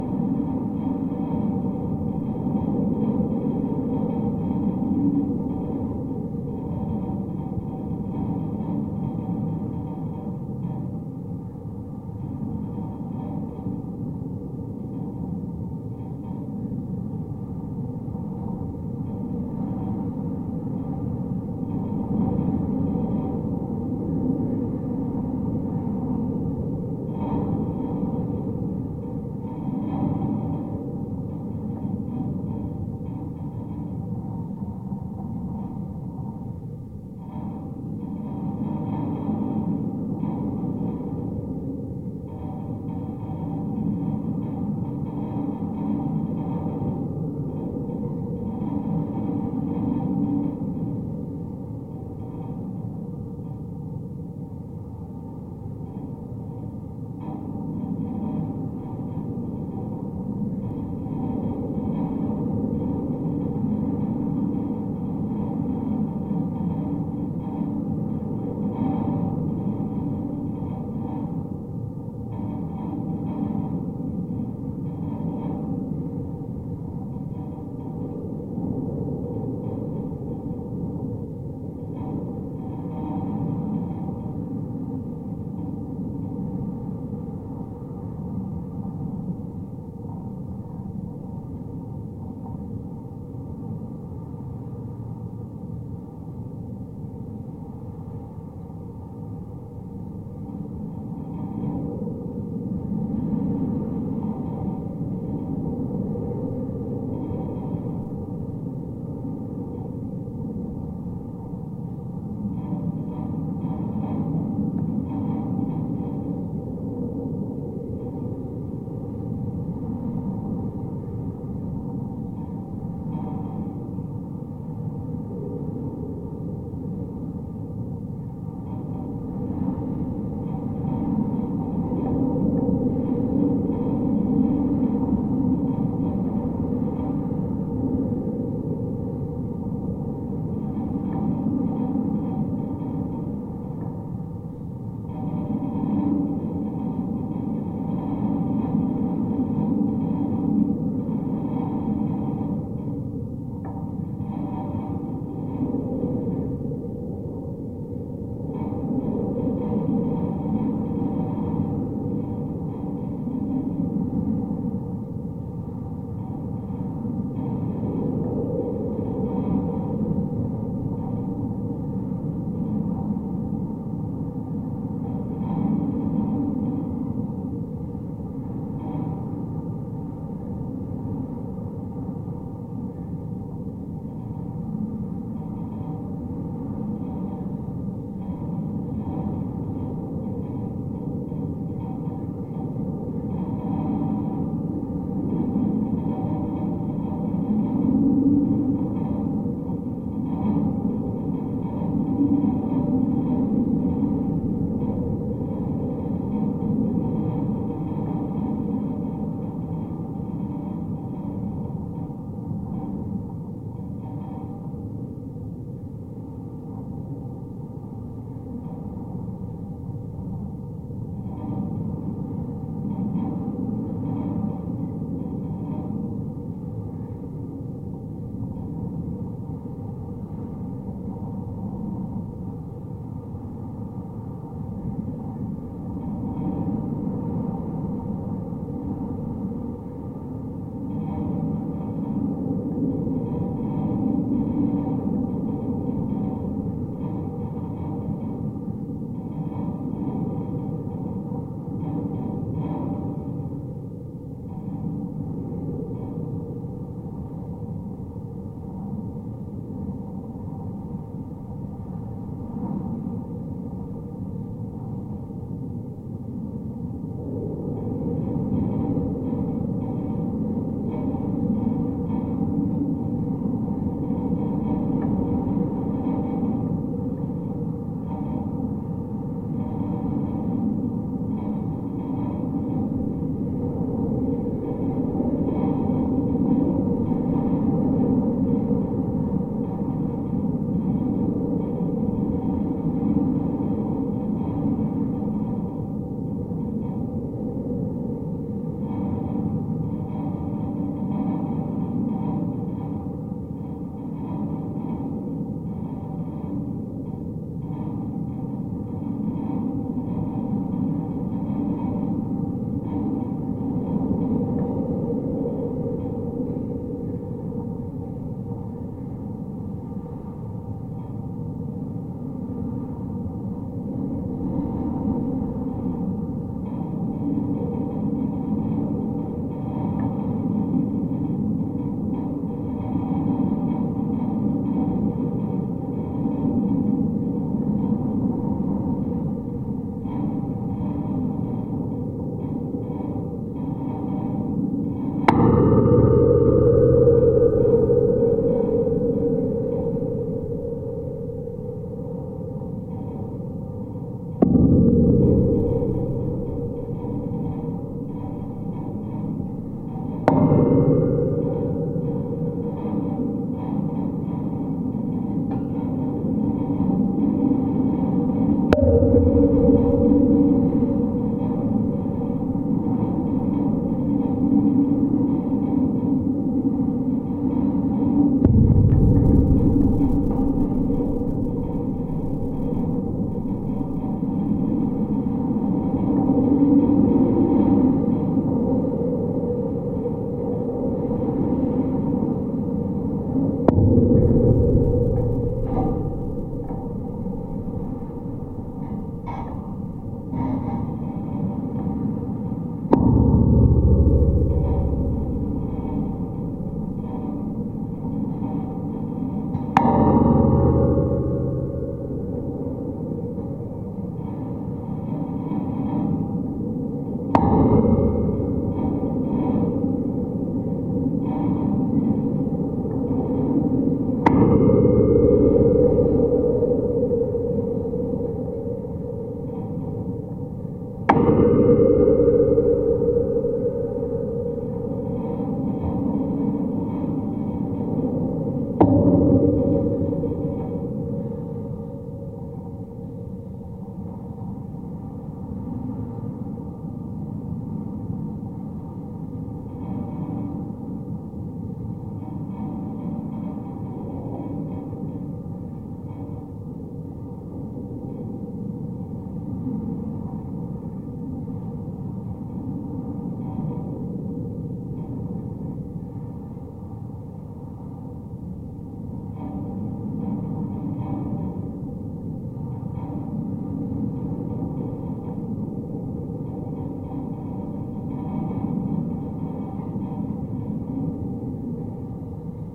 (GF) Metal wire fence vibrating in the wind
I attached a Geofon to a metallic fence securing a radar installation in Paljassaare Estonia. You can hear a lot of wind affecting the overall structure, making it vibrate.
Careful with clipping! In the end I hit the metal a few times to check on the resonance.
Recorded on a MixPre6 with a lowcut at 160Hz on high gain, so you can hear some noisefloor from the recorder.
hits, geofon, wind, contact, rumble, metal